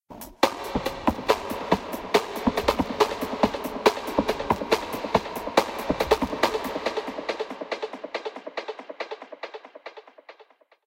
This was a loop I chopped up in Garageband. I don't have a beat slicer so I reduced the loop to its individual hits by zooming in and rearranging it. Then the loop was doubled and different effects applied to mangle it beyond recognition.